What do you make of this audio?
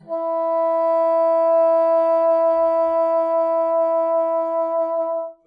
One-shot from Versilian Studios Chamber Orchestra 2: Community Edition sampling project.
Instrument family: Woodwinds
Instrument: Bassoon
Articulation: vibrato sustain
Note: E4
Midi note: 64
Midi velocity (center): 42063
Microphone: 2x Rode NT1-A
Performer: P. Sauter